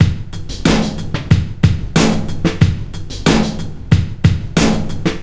fl studio sequencer + vst slicex + a snare additional.
Adobe audition for reverb
beat for sampler mpc,sp,.....
loops, beat, drumloops, hop, breaks, hihop, beats, drum, drumloop, loop, break, drums, hip